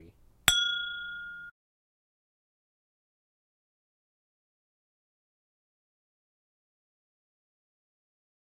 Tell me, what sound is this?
OWI Crystal class ping
crystal class being flicked
chime, crystal, ding, ping, ting